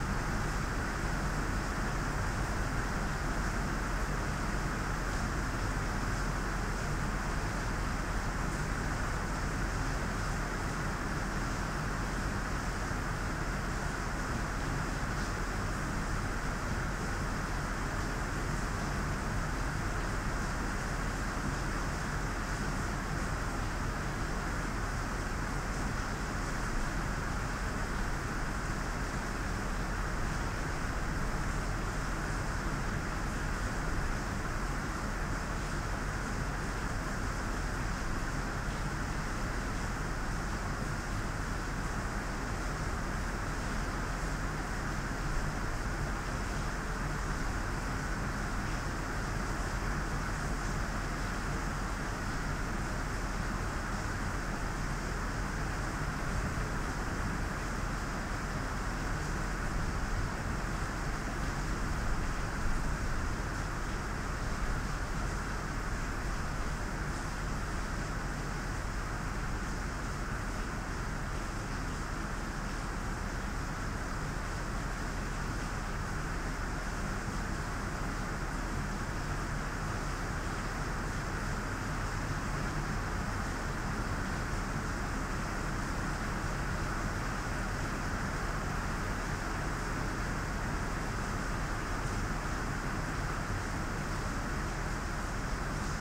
20170628 Heavy Rain in Bangkok 03
Heavy rain in Bangkok, Thailand, recorded inside the house.
Microphone: MXL V67 -> Audio Interface: Focusrite 2i4
lightning, nature, rain, shower, storm, thunder, thunderstorm, weather